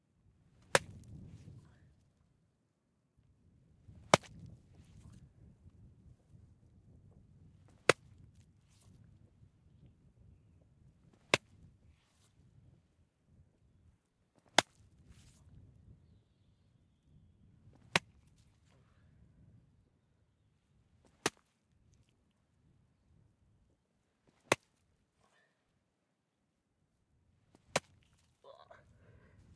smashing cantelope 1-2
Smashing a cantaloupe with a golf club- makes a sound similar to smacking a skull. Some slight splatter sounds in a few of the strikes
strike, hit